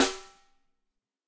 Ludwig Snare Drum Rim Shot
Snare, Ludwig, Drum, Shot